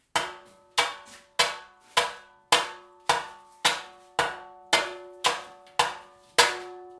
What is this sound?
Metallic Hollow Thuds Various 2
Smash, Boom, Tool, Friction, Steel, Plastic, Hit, Bang, Tools, Metal, Crash, Impact